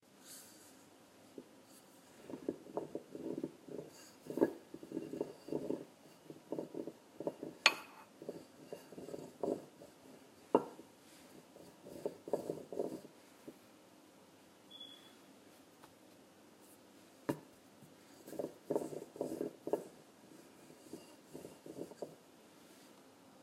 Wooden Rolling Pin on Marble to Roll Roti
Sound of rolling wooden rolling pin (or Belna) on Marble surface (Chakla) during making roti or chapati for indian cooking.
Roti, Rolling, Marble, Wooden, Cooking, Chapati, Pin